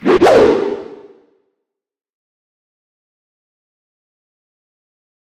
A cartoony swoosh sound made from time-stretching whipping of a XLR cable (which are thick and heavy). Some reverberation was applied for "color".